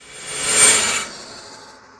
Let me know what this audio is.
MAGICAL EFFECT
Reversed Reverb on a steel door from Al Ain Zoo.
fantasy, icey, magical, spell, wizard